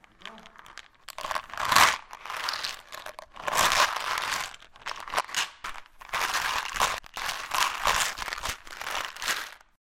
Forbes Project 1 1#13
Found a jar of vitamin and shook it in random ways.
MTC500-M002-s13Vitamin, a, rat, shakerrattlepop